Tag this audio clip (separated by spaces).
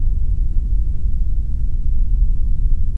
background noise ambient general-noise tv-noise ambience atmosphere white-noise background-sound